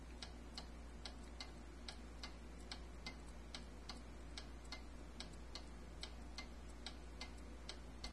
ticking clock 1
Small wooden clock ticking.
clock, ticking